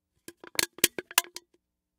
empty soda can squish 3
Empty soda can crunched slightly with hand.
Foley sound effect.
AKG condenser microphone M-Audio Delta AP